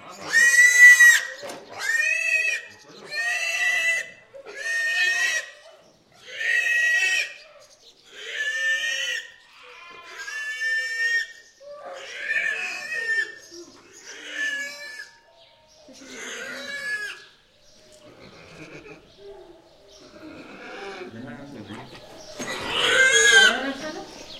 not for the faint of heart, young pigs' slaughter. Recorded in a country house's open yard near Cabra, S Spain. Sennheiser ME66 + MKH30, Shure FP24 preamp, Edirol R09 recorder. It was very hard for me to record this so I hope it's any use (should be hard to synthesize, I guess...)
EDIT: I feel the need to clarify. This is the traditional way of killing the pig in Spanish (and many other countries) rural environment. It is based on bleeding (severance of the major blood vessels), which is not the norm in industrial slaughter houses nowadays. There stunning is applied previously to reduce suffering. I uploaded this to document a cruel traditional practice, for the sake of anthropological interest if you wish. Listeners can extract her/his own ethic/moral implications.
squeal, meat, death, slaughter, field-recording, horrific, nature, animal, pig, suffering, scream, butchering